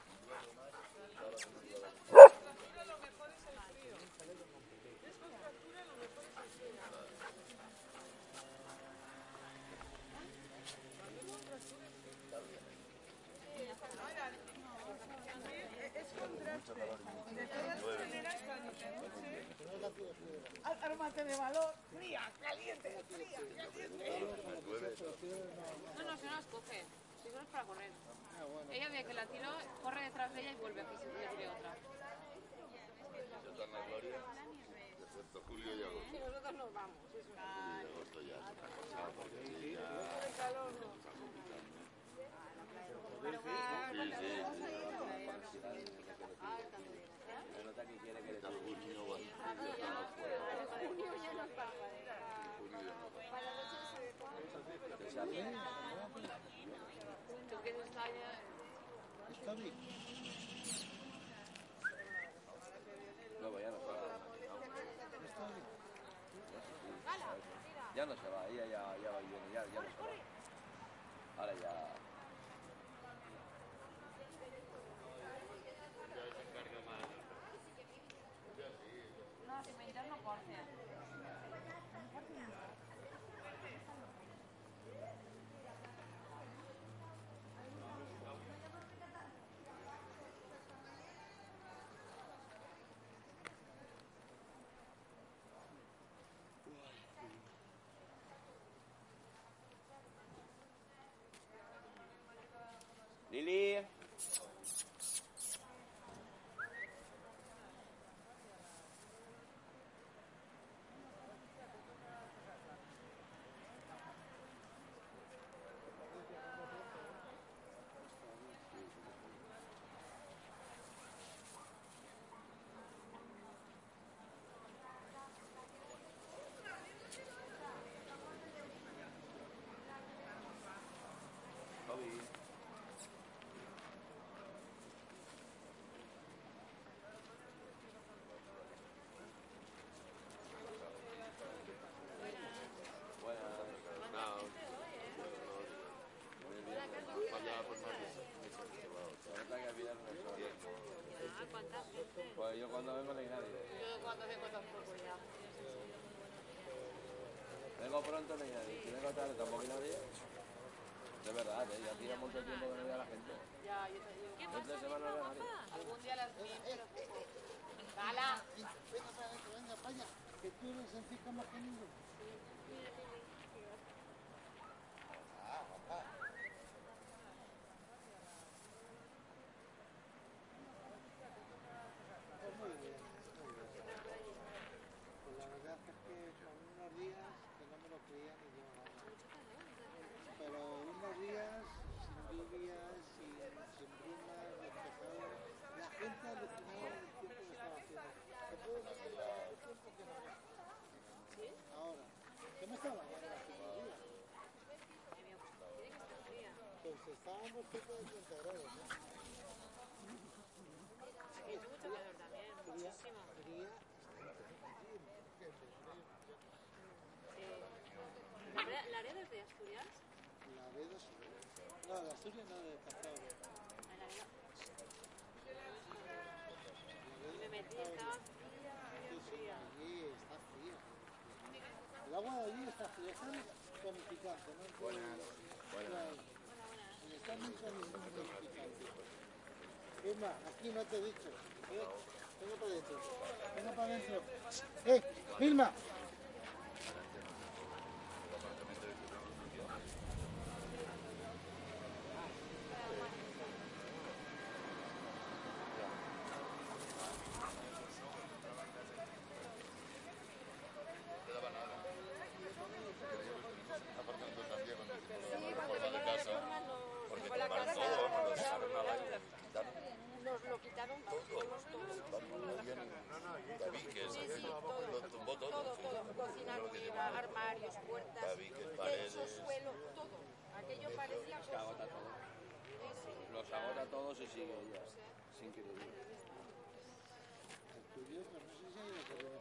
Sound of a group of dogs playing and running and the conversations of their owner. We can also hear other normal sounds of this kind of situations like whistles, barks...
Recorded with Zoom H4n recorder. Recorded about 20:15 on 25-11-2015